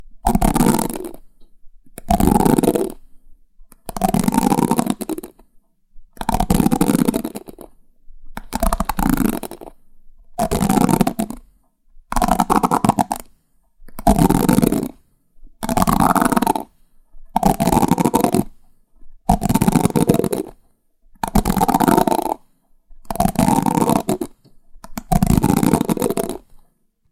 Playing with the comb